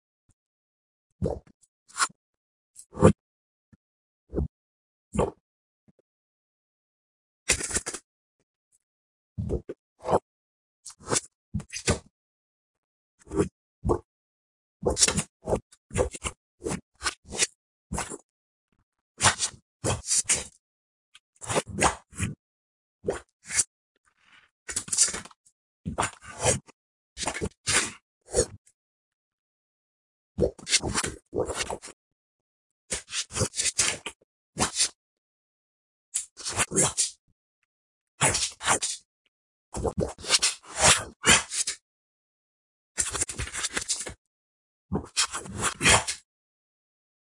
Just want some justice for insectoids. Did a couple more insectoid speeches. This one has reverses in it to sound scarier.
•√π÷¶∆°^
clicking, mutant, growl, beast, Insectoid, horror, creepy, scary, crawling, insect, monster, haunted, creature